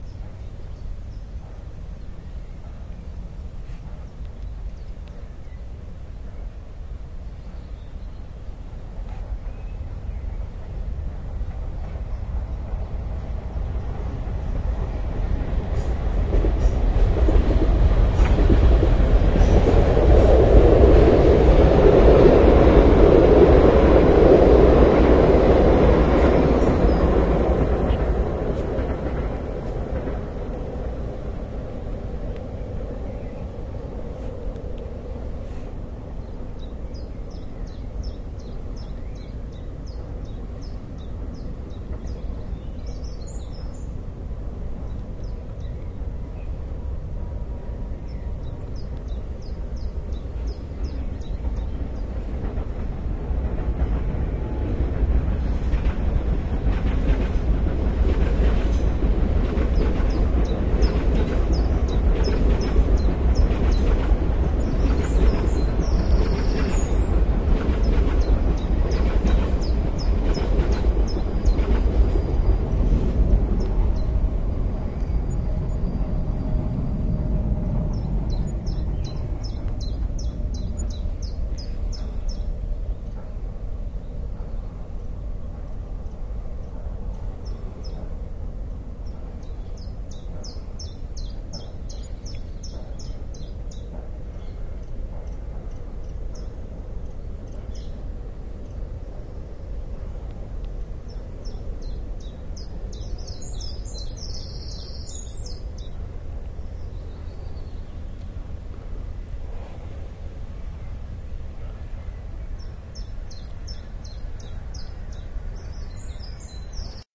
On the 4th of may 2007 I sat on a bench in the park with my Edirol R09 recording two trains passing behind me and an airplane above me while in the distance a pile driver is driving a pole into the dutch swampy earth.